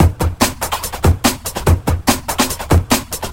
Hardbass
Hardstyle
Loops
140 BPM
Loops, BPM